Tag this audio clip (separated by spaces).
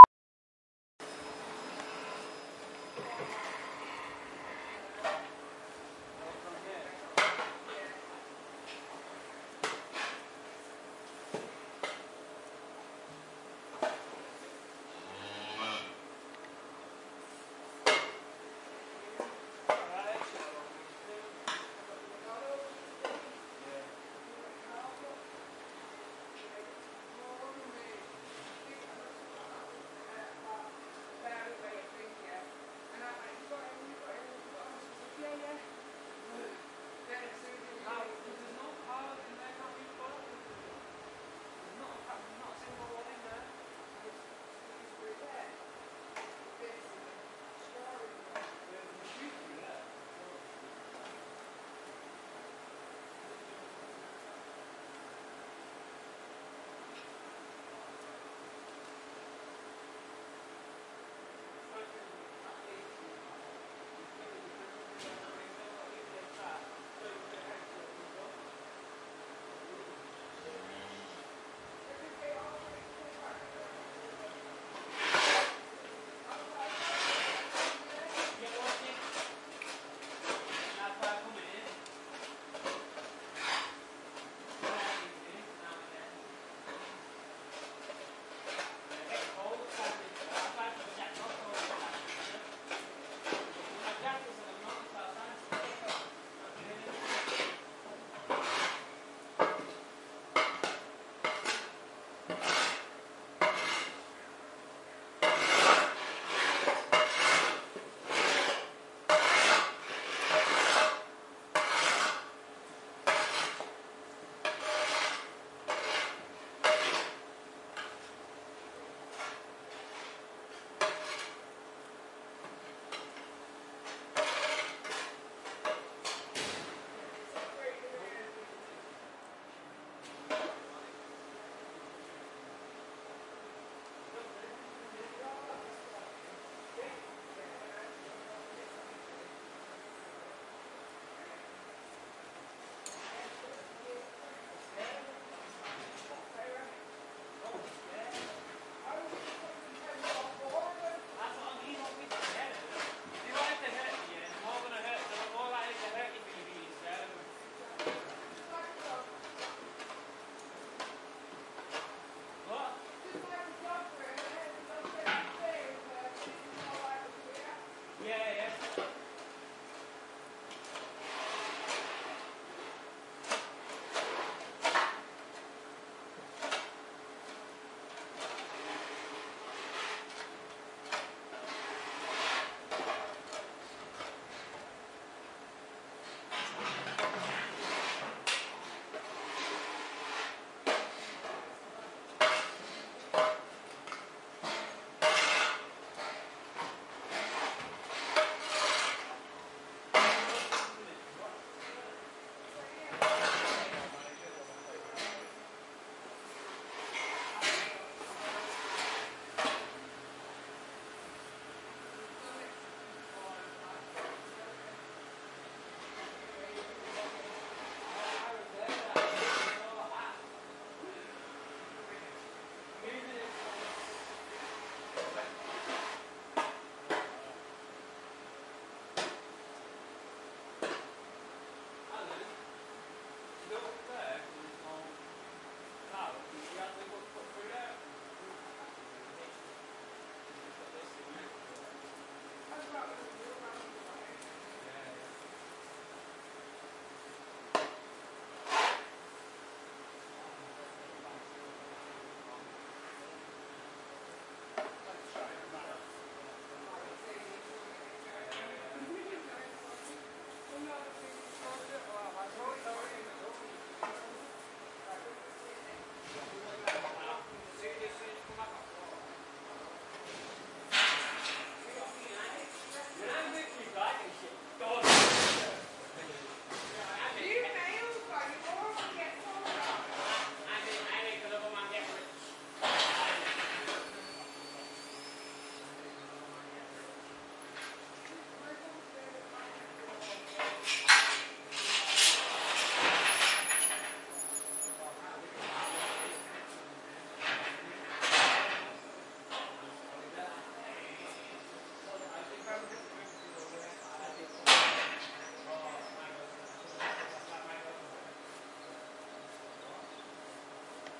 Ambience ambient farm farmland field-recording workers